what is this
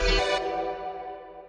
click sfx4a
This is a pack of effects for user-interaction such as selection or clicks. It has a sci-fi/electronic theme.
select, effects, fx, click, icon, interaction, feedback, response